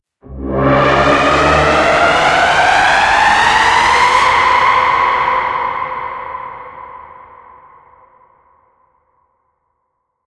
Entirely made with a synth and post-processing fx.